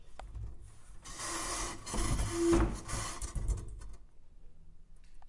schuiven tafel
domestic, home, house-recording, indoor